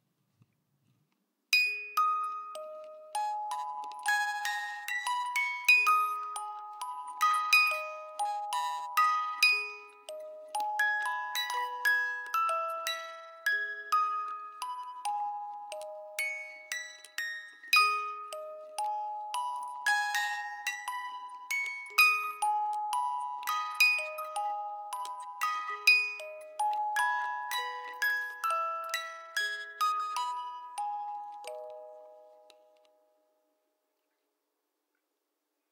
small MusicBox Swan Lake (Tchaikovsky)